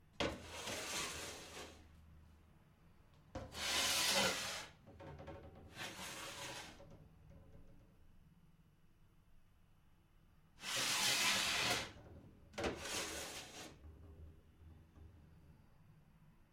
Moving glasses of the kitchen cabinet.
glasses, kitchen